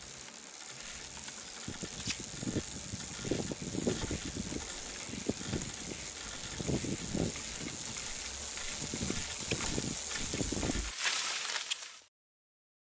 Brake Grass Med Speed OS
Mountain Bike Braking on Grass